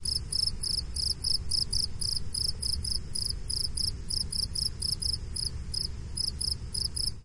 This is a fairly close-up recording of a cricket chirping at night. The recording was made with a Tascam DR-05.
cricket, chirping, crickets, chirp, nature, night, field-recording